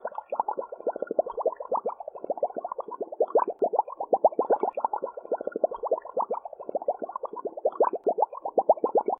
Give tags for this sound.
boiling brew Bubbles cooking design effect game gurgle magic mixture sfx sound sounddesign water

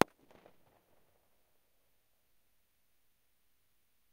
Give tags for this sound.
Bang Explosion Firecrackers

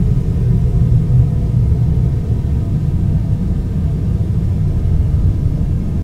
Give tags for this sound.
ambience atmos ambiance tone tunnel general-noise tonal background soundscape factory horror drone ambient noise atmosphere loop industrial

